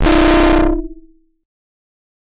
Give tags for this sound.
modelling; percussive; physical; pianoteq

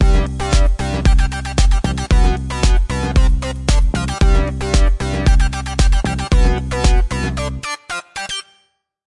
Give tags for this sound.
steem; speak; speech; texttospeech; voice

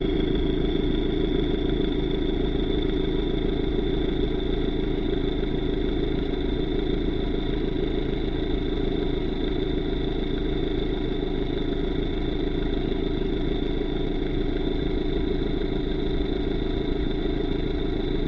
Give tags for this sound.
flow; Oil; sound; stethoscope

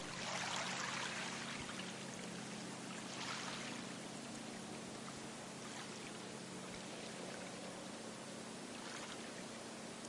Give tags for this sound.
island; florida; seahorsekey

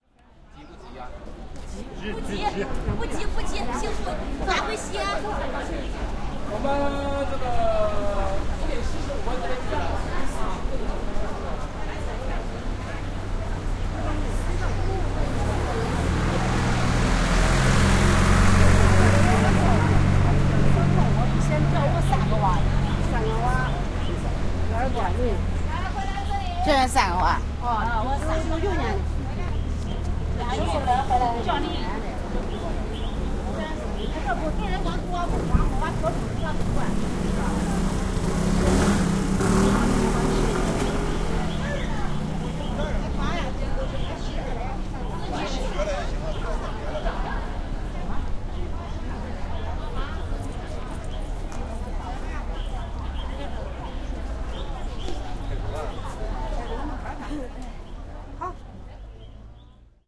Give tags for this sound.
field-recording; macao; University-of-Saint-Joseph

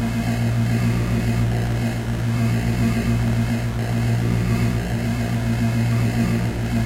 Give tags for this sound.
8bit; ambiance; ambience; ambient; atmosphere; background; crushed; dark; effect; free; fx; horror; loop; looped; loopmusic; noise; pad; sci-fi; sfx; sound; soundeffect; synth

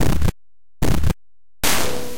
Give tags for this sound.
bertill; crushed; destroyed; drums; free; needle; pin

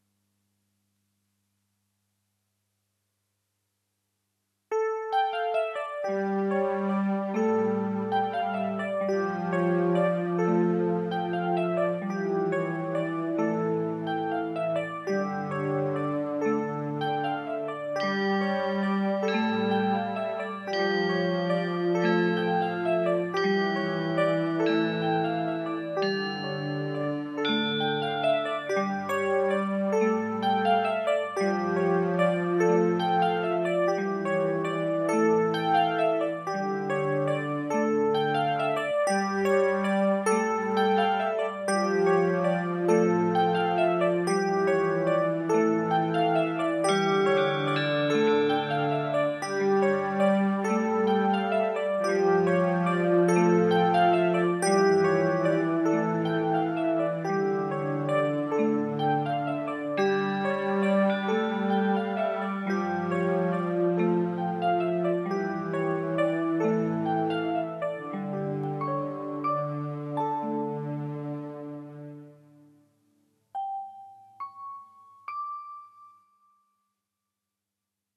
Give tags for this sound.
Movie; Creepy; Horror; Scary

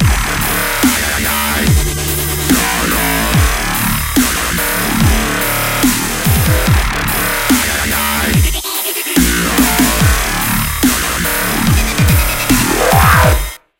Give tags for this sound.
Drums; Dubstep; Fl; growl; Loops; studio; Wobble

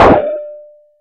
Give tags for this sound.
industrial; drum; percussion; synthetic; metal

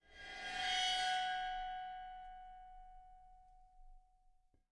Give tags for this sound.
beat; bell; bowed; china; crash; cymbal; cymbals; drum; drums; groove; hit; meinl; metal; one-shot; paiste; percussion; ride; sabian; sample; sound; special; splash; zildjian

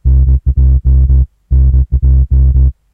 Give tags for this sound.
pulsey; synth; retro; bass; square-wave

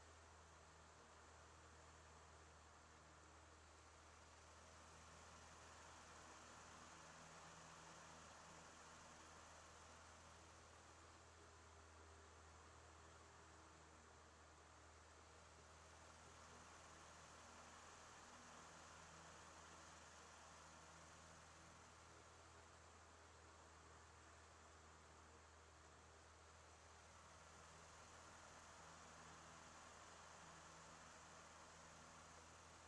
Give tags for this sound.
elements; wind

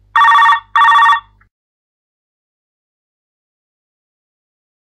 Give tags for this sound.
dutch; phone; rings; twintoon; 2; ptt; t88; vox120

